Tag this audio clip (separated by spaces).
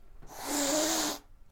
anger cat fury hiss noise pet